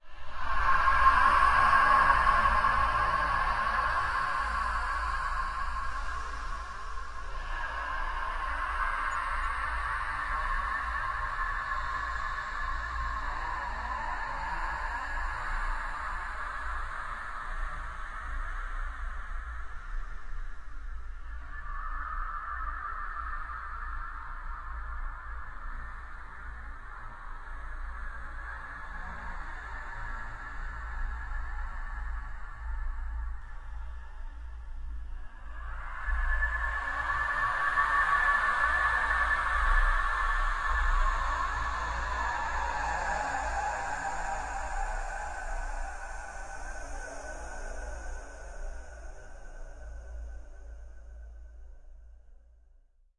exhale spacy spooky
Slow exhale with a spacy/spooky reverb. The breathing was recorded using a CAD M179 and then processed with a modulating reverb in Reaper.
effect, sfx, processed